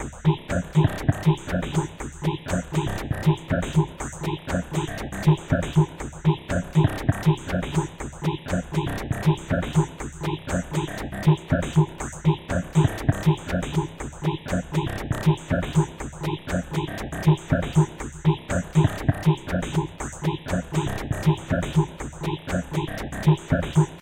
Copyc4t mello01+rhythm00A
120bpm, dare-26, image-to-sound, loop, loopable, picture-to-sound, Reason, seamless-loop